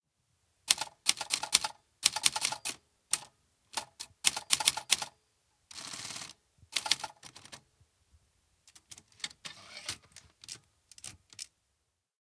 Sonido: 26
Etiquetas: MaquinaEscribir Audio UNAD
Descripción: Captura sonido de Máq. Excribir
Canales: 1
Bit D.: 16 Bits
Duración: 00:00:12